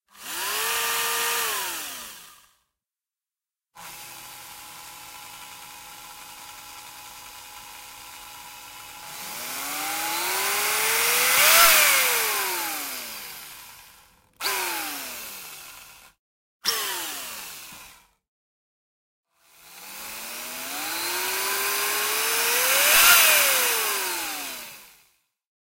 Futuristic Drill Machine
Slightly designed sound recorded using a drill. Perfect for robot sounds, machines, sci-fi and servo motors.
robotic, whir